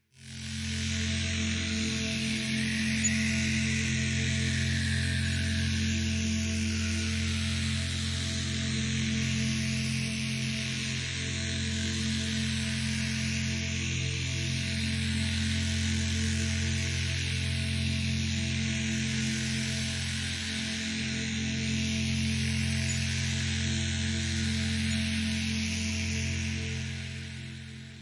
Electric Ambience
A synthetic electronic ambience
abstract, ambience, futuristic, sci-fi, electronic, synth